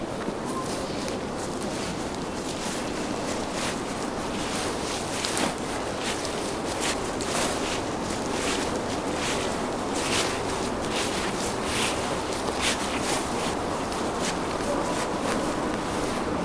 3 persons walking slowly on grass noisy ambient 2
footsteps, grass, slowly, steps, walking